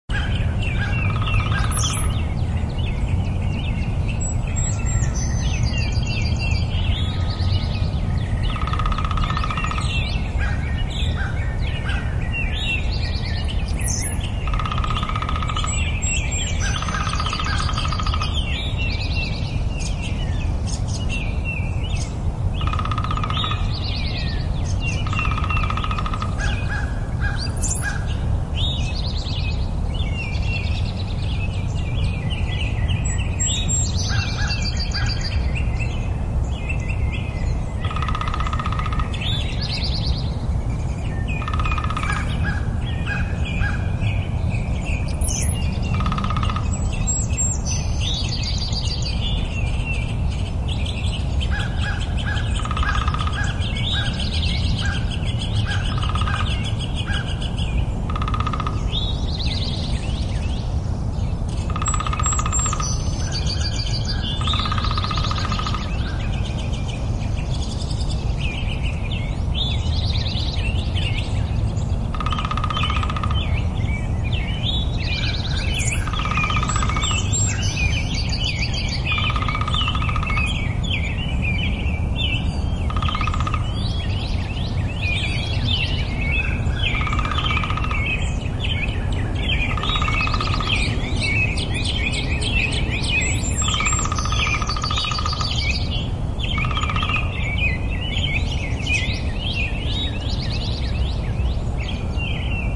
Woodpecker and Other Birds
Birds recorded in suburban location, includes woodpecker.
birds, birdsong, field-recording, nature, spring, woodpecker